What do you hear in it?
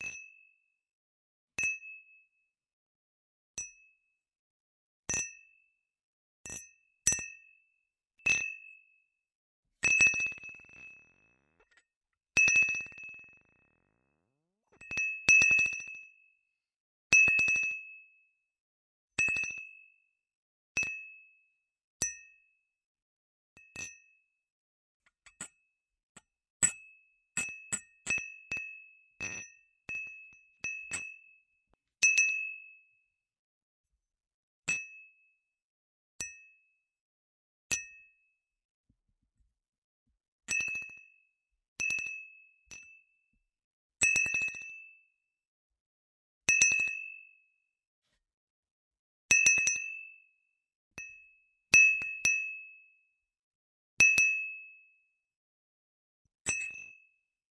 20180328 Clinking glasses
clink, clinking, glass, glasses, onesoundperday2018, ping, small, toast